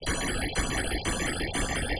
Percussive rhythm elements created with image synth and graphic patterns.